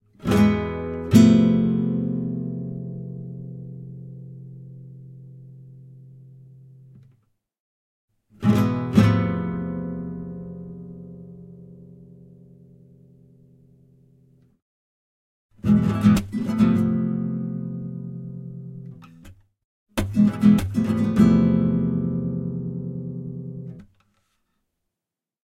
Nylon guitar - spanish pattern - E major
Spanish musical motif with classical guitar (nylon strings)
chord, spanish, guitar, classical, string, acoustic, music, major, nylon, e, f